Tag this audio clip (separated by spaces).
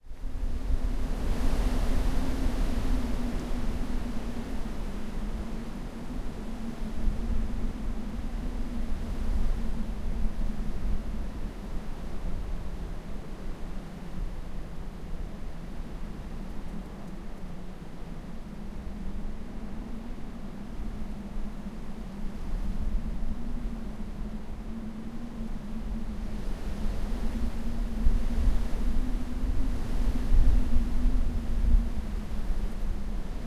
bleak lines phone-lines telephone wind